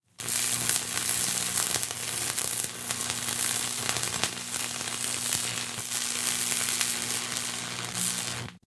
This is a recording of an arc welding machine in use welding steel.
Equipment:
Midside setup(Schoeps miniCMIT, Schoeps CMC 5U MK8)
Stereo pair DPA 4060 omnidirectional mics
Sound Devices MixPre-6
arc, art, electric, electricity, fixing, industrial, metal, repair, shop, spark, sparks, tool, tools, weld, welding